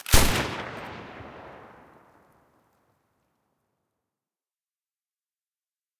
This is the second version without the mechanical bolt. Maybe can be used for bolt action rifles.
Made this is ableton live, it is multilayered with the top end of a 9mm and the low end of an. Another carbine sound is faded in after the transients to give more sustain. Two reverbs fade in and out creating a smooth transition and blend. A little white noise is added for subtle sheen and air.
All the layers were grouped together into a single band compressor and ran through serum fx distortion and then into a krush distortion plugin for added fatness. A blending reverb was used to glue the sounds into the same space and ran into a final transient designer which is adding a little more snap and punch and clipping the excess transients off.